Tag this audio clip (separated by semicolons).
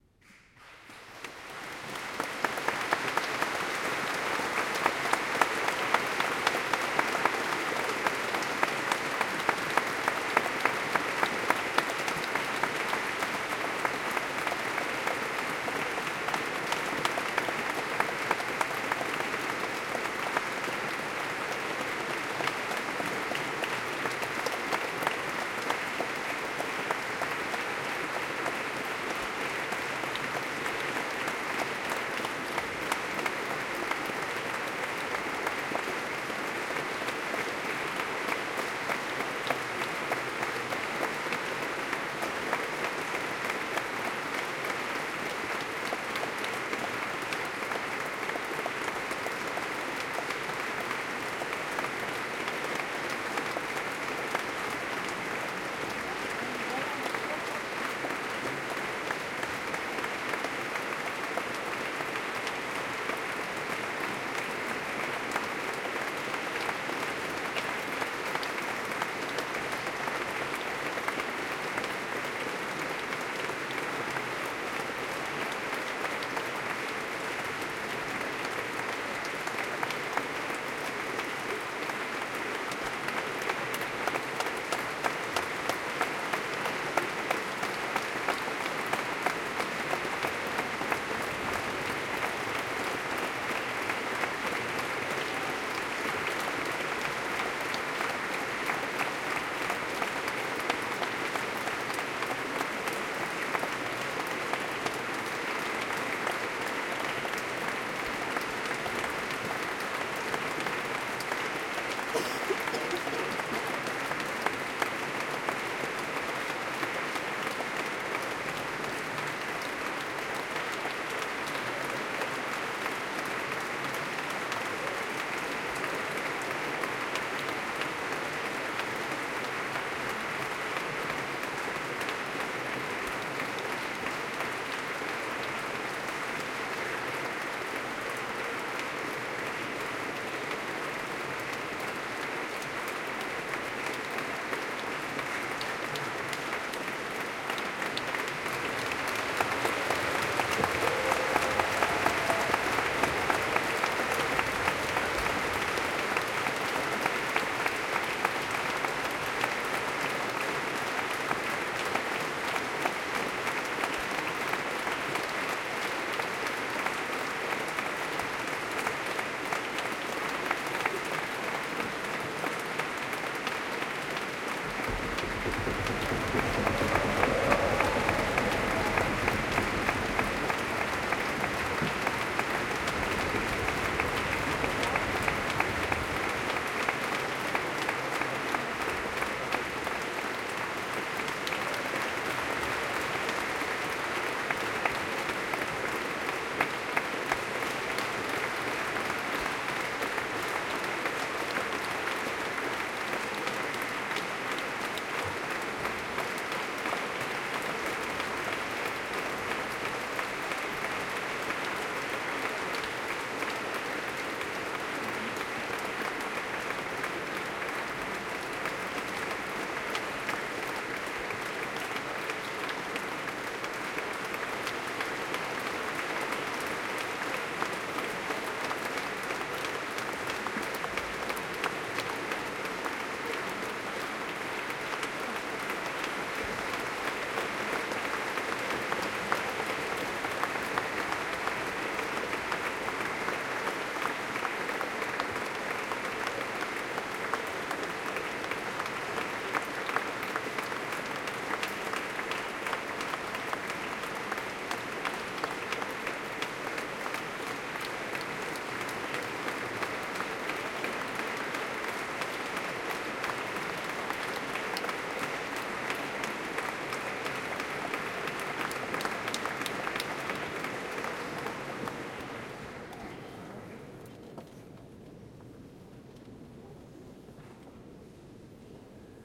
acclaim; applause; big-hand